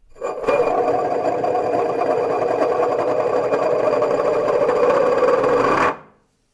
A glass spinning until it stops - wooden surface - interior recording - Mono.
Recorded in 2001
Tascam DAT DA-P1 recorder + Senheiser MKH40 Microphone.